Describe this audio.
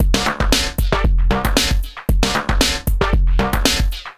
Funky drumloop with slightly resonating delay + rhythmic pattern